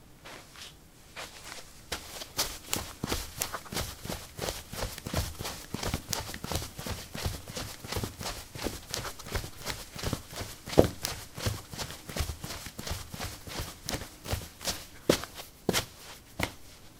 carpet 04c sandals run
Running on carpet: sandals. Recorded with a ZOOM H2 in a basement of a house, normalized with Audacity.
footsteps, footstep